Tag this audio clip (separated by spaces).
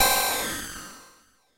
analog drum emtallic formant fx monotribe percussion